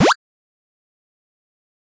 Jump sound or Power Up sound
A sound you can use in a platformer game